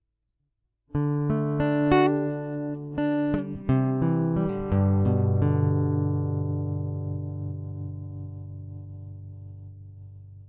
Just a short intro jingle played on guitar